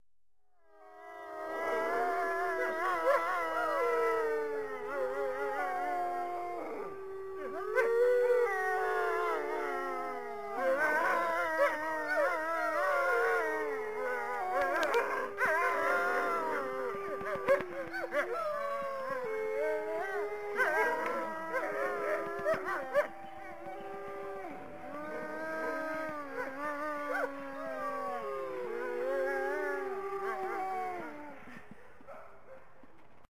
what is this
wolves howling - recorded at Wolf Haven International - a wolf sanctuary in Washington
State